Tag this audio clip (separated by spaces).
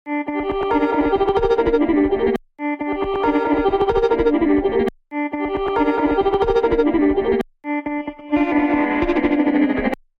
falling pad space